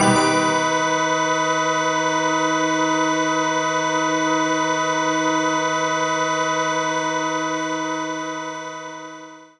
PPG Digital Organ Leadpad C4
This sample is part of the "PPG
MULTISAMPLE 002 Digital Organ Leadpad" sample pack. It is an
experimental sound consiting of several layers, suitable for
experimental music. The first layer is at the start of the sound and is
a short harsh sound burst. This layer is followed by two other slowly
decaying panned layers, one low & the other higher in frequency. In
the sample pack there are 16 samples evenly spread across 5 octaves (C1
till C6). The note in the sample name (C, E or G#) does not indicate
the pitch of the sound but the key on my keyboard. The sound was
created on the PPG VSTi. After that normalising and fades where applied within Cubase SX.